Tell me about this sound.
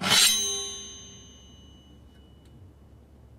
Sword Slice 17
Seventeenth recording of sword in large enclosed space slicing through body or against another metal weapon.
slice, sword-slash, slash, sword, movie, foley